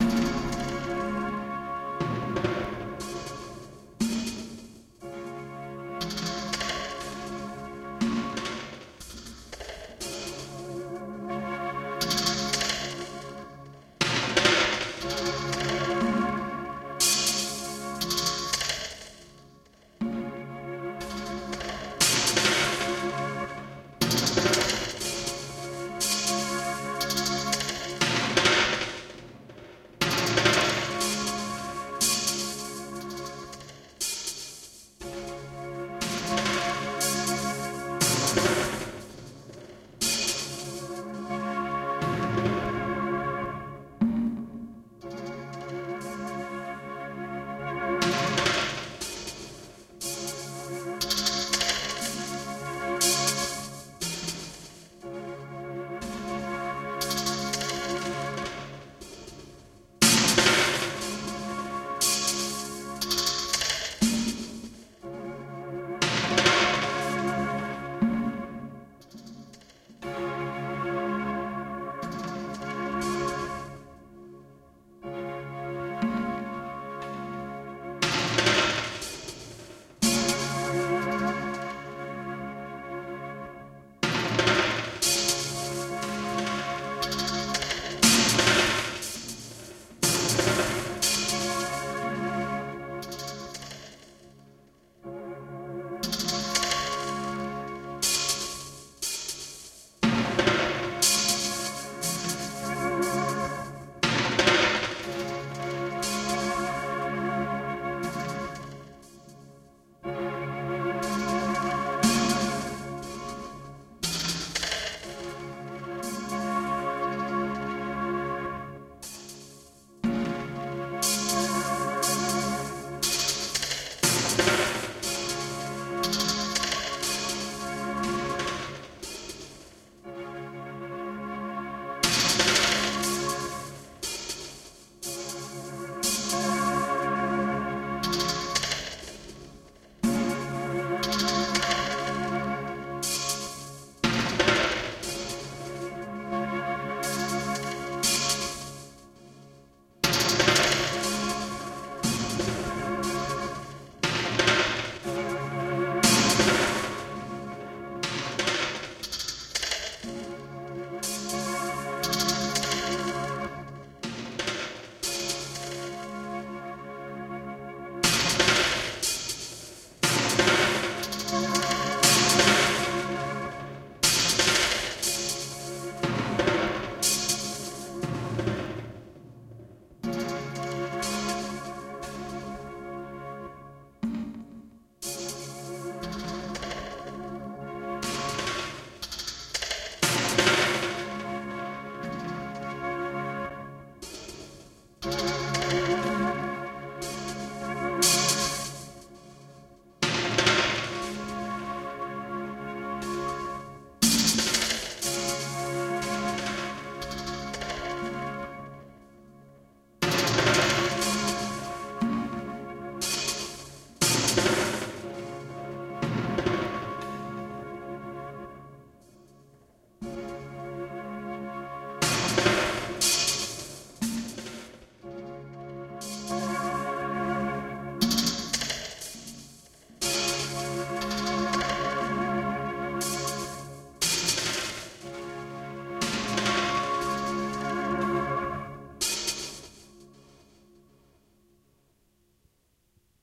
8tr Tape Sounds.